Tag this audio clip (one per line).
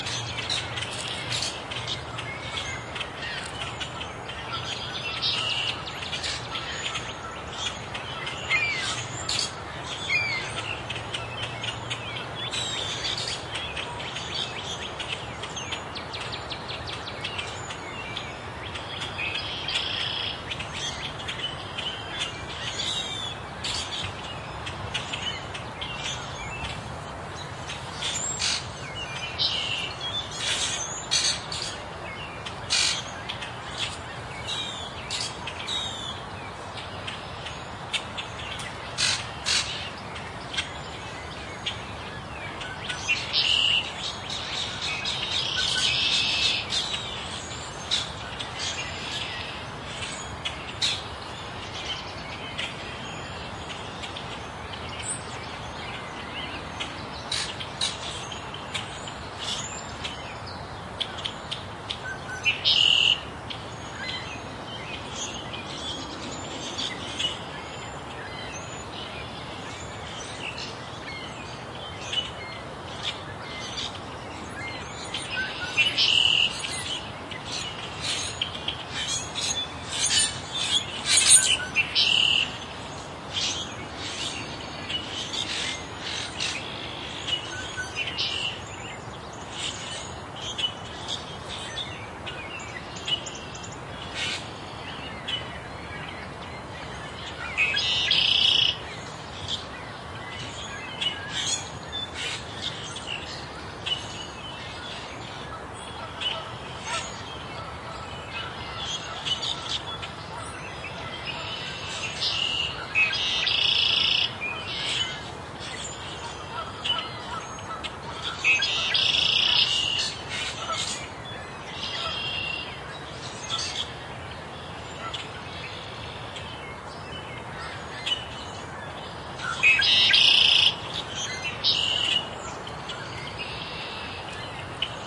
traffic
birds
Zoom
Q3
Chicago
spring
field-recording
morning
Montrose-Beach